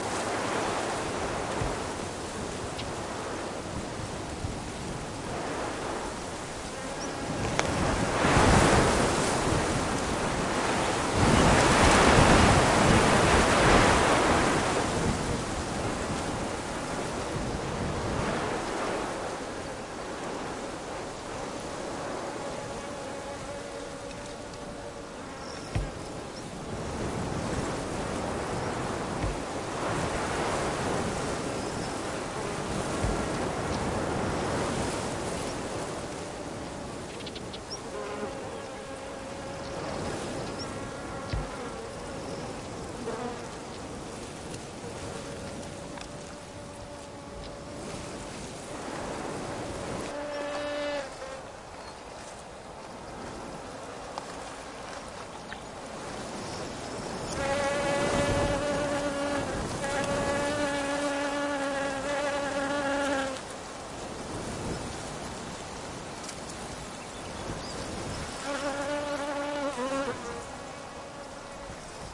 sound of wind gusts on Cistus scrub, with some birds and bees in background. Rycote windshield > Sennheiser K6-ME62+K6-ME66 > Shure FP24 > iRiver H120. Unprocessed / viento golpeando jaras, con algunos pájaros y abejas al fondo